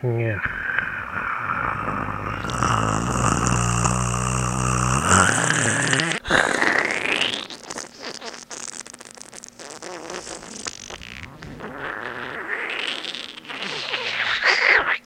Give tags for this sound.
gurgling; mouth; slurp; sucking

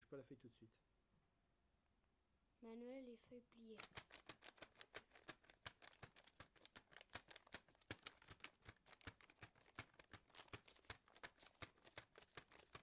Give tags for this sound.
messac; mysounds

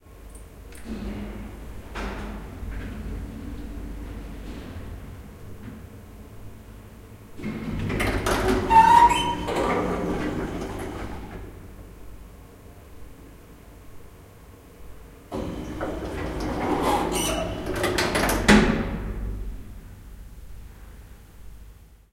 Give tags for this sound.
closing; elevator; machine; open; opening; russia

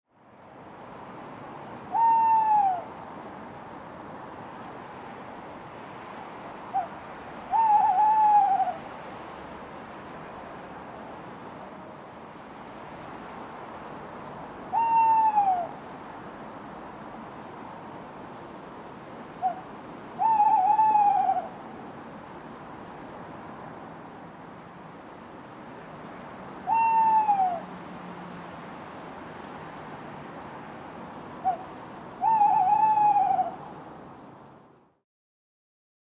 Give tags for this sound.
Ambience; Hoot; Night-time; Owl; Tawny

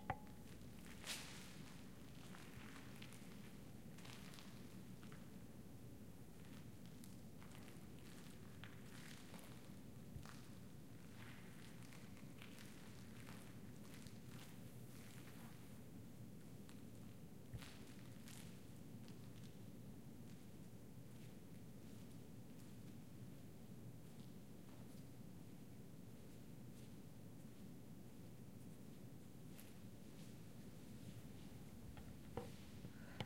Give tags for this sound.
crackle; glitch; salt; steps

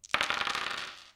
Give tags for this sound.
dice; roll; recording; effect

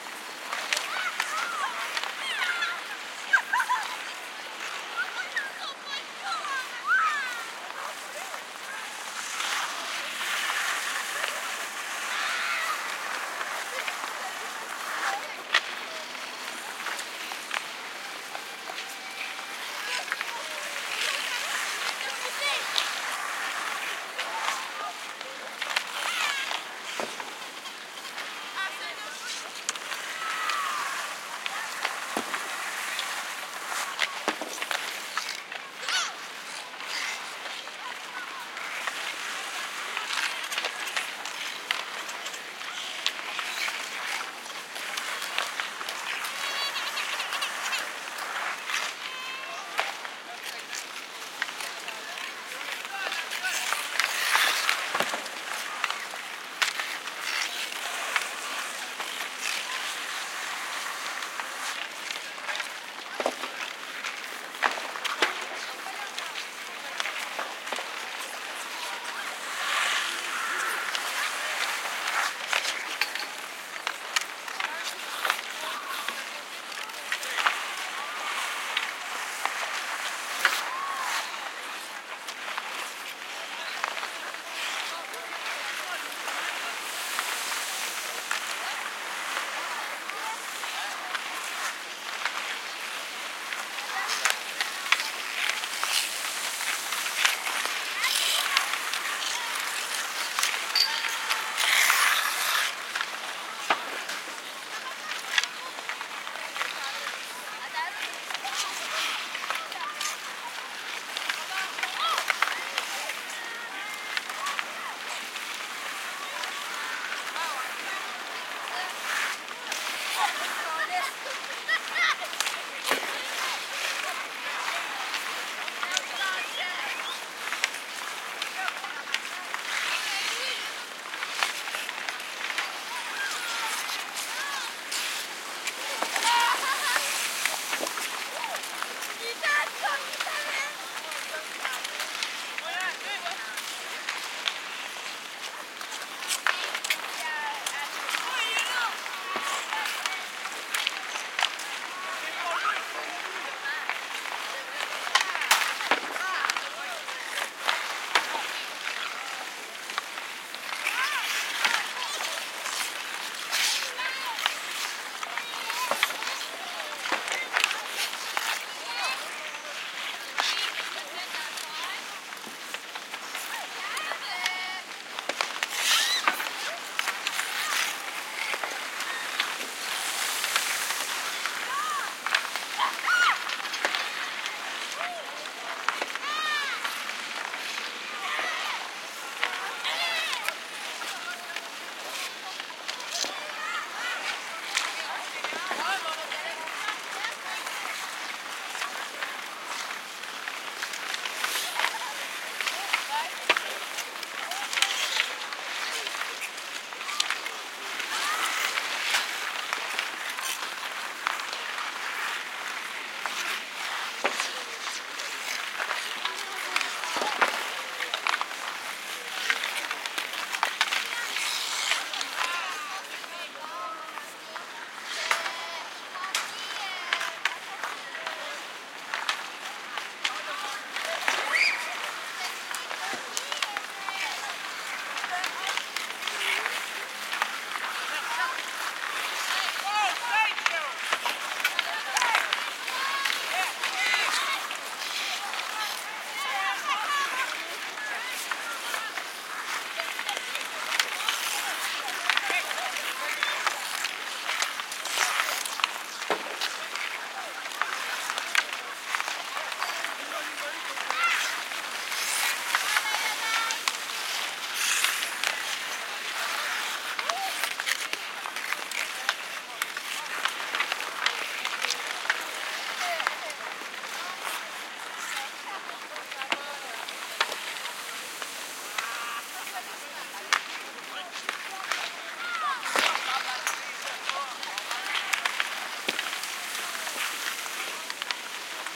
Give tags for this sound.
kids; outdoor; rink; skating; teenagers

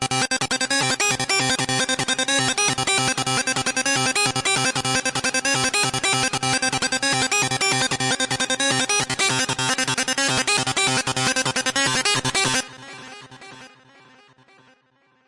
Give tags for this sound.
trance; goa; electro; loop; lead; synth

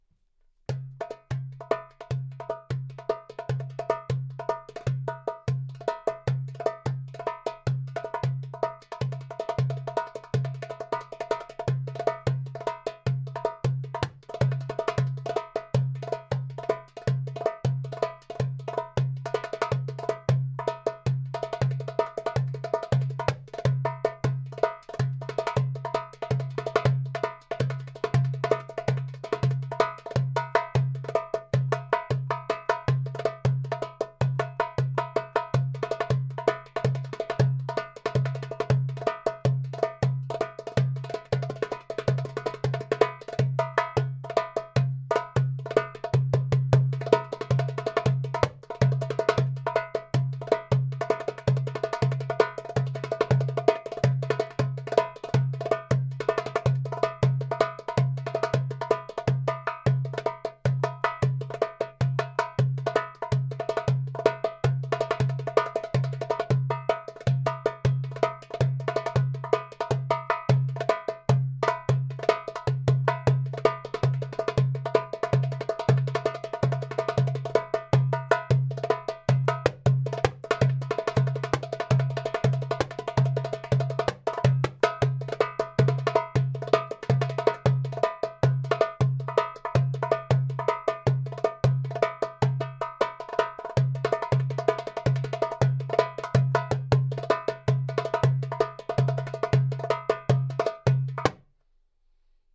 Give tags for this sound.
CompMusic; percussion

